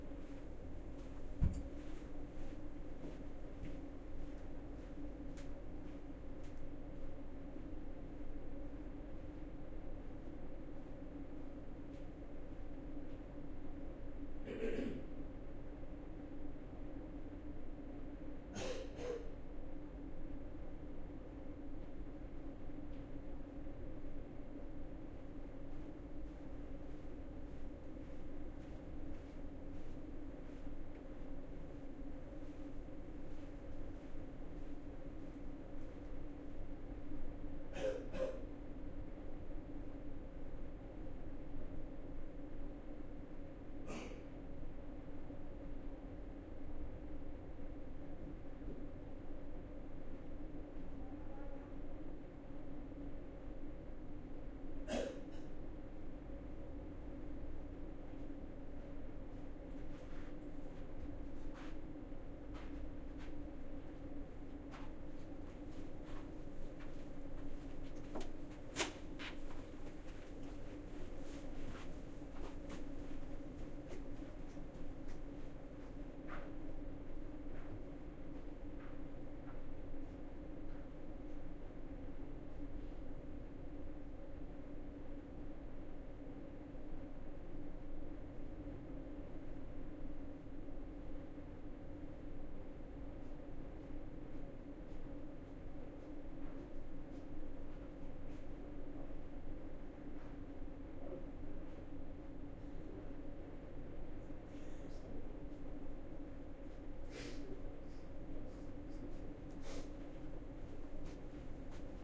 Ambience Hotel corridor people Jacklin disk 01
Recording from top floor clarion hotel oslo. Recording is in the suite of the hotel and i have been useing two omni rode mikrofones on a jecklin disk. To this recording there is a similar recording in ms, useing bothe will creating a nice atmospher for surround ms in front and jecklin in rear.